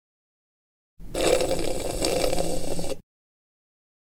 Slurping through straw